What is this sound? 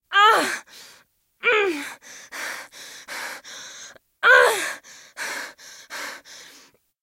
WIT3 - struggle
fear, scream, woman, frightened, human, persecution, pain, suspense, voice, female, hurt, breath, trouble, crime, trapped, cry, film, scared, breathing, girl, horror, struggle, tension, danger, gasp, threat